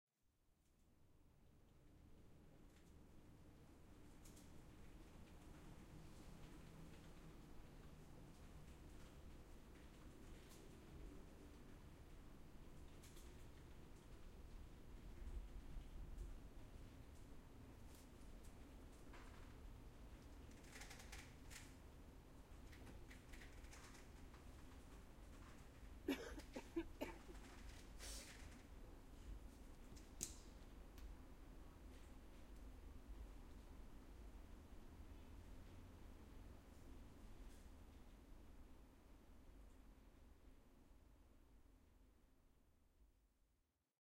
Bunyi no.13 batuk siapa
Cough, effect, efx, fx, sfx